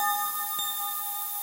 SonoKids-Omni 25
A collection of 27 samples from various sound sources. My contribution to the Omni sound installation for children at the Happy New Ears festival for New Music 2008 in Kortrijk, Belgium.
happy-new-ears, bell, sonokids-omni